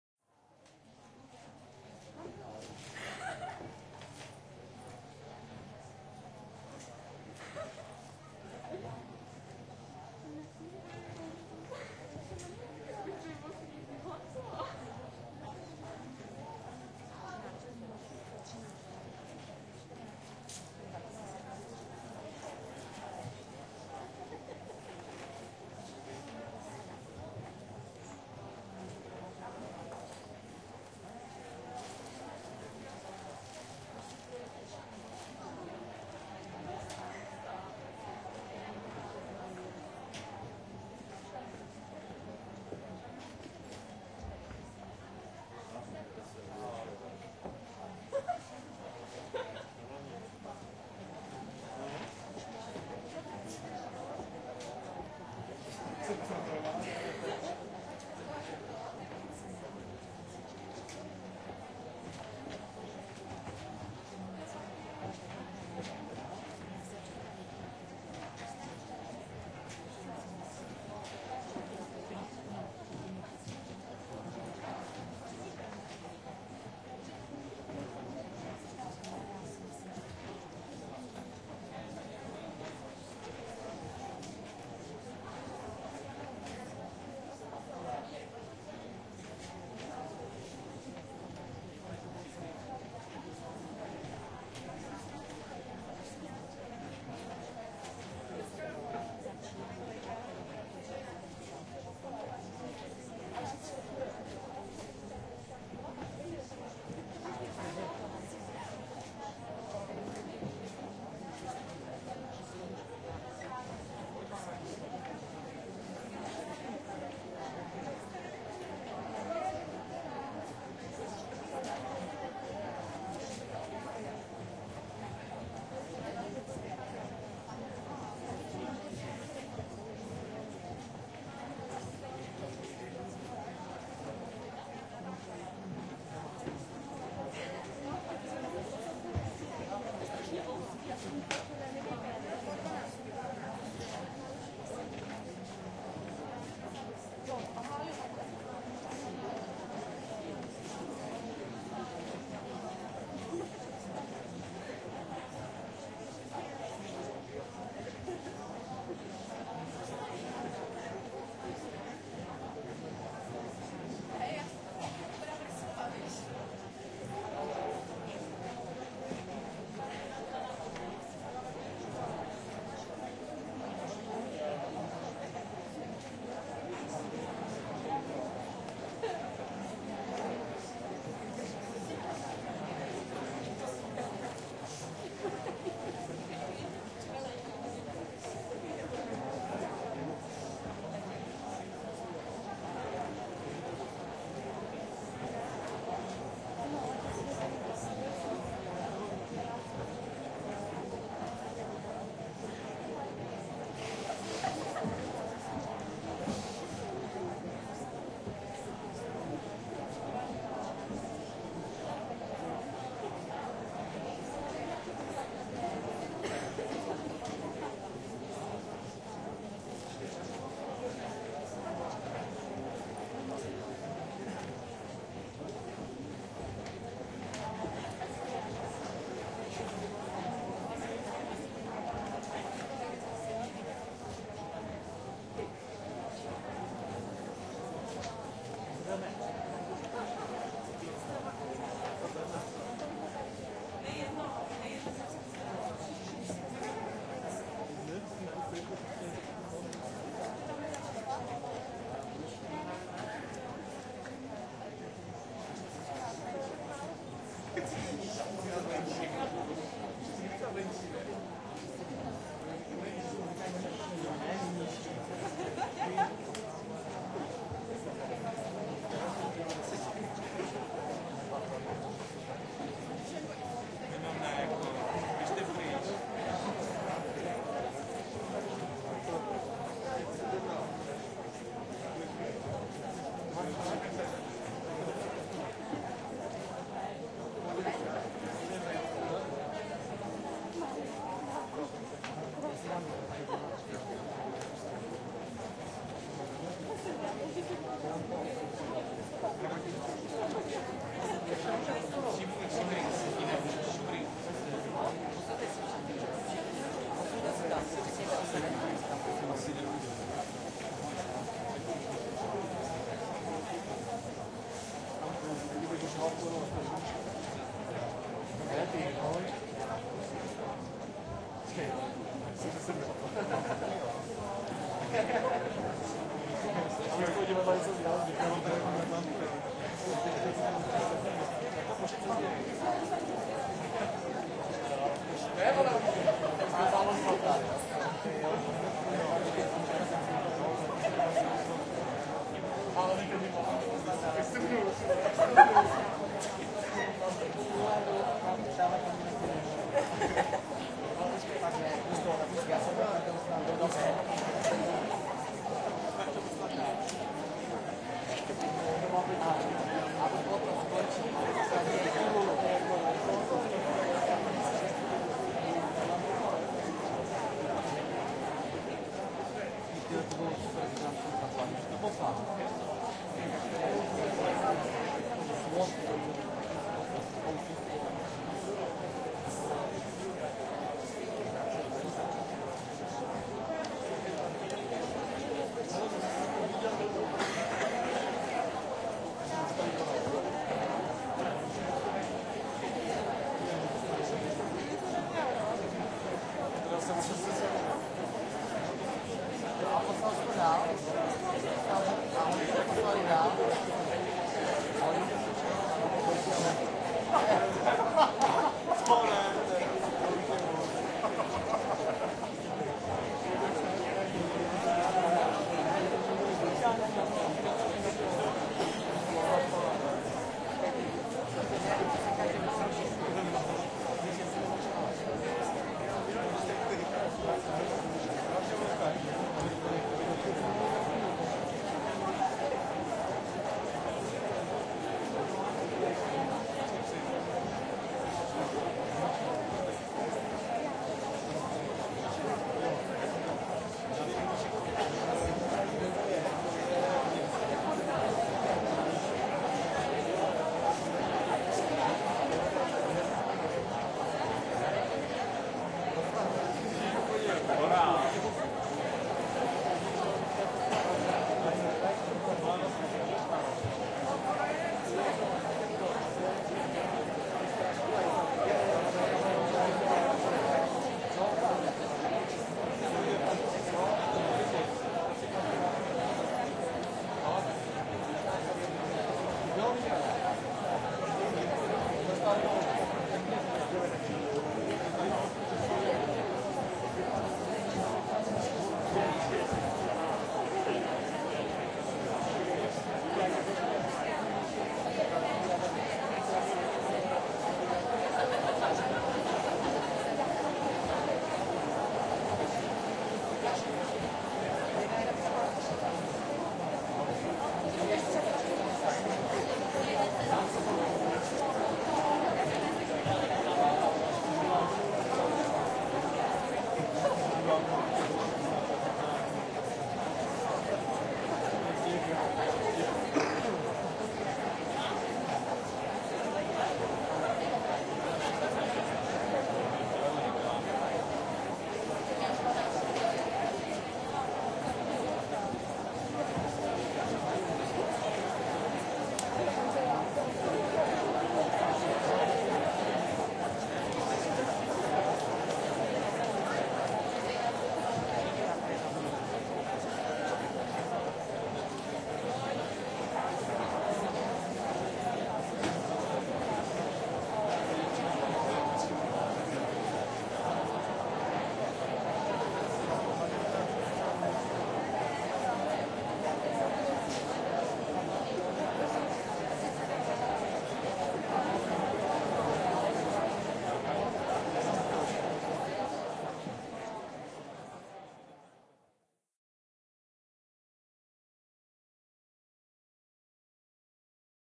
Audience is coming to the theatre
People are coming to theatrePeople are coming to medium theare, to auditorium, from foyer and waiting for the performanceRecorded with MD and Sony mic, above the people
audience, auditorium, crowd, czech, foyer, laugh, murmur, prague, theatre